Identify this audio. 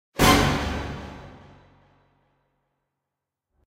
Shock Stab 10
A loud orchestral stab for a horror reveal.
I'd love to see it!